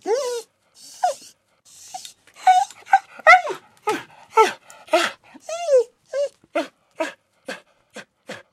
Dog Crying for a Hug
My dog asking for a hug.
crying, whining